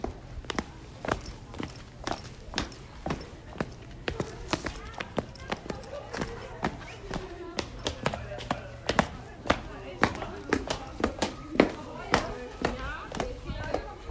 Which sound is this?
walk step footstep walking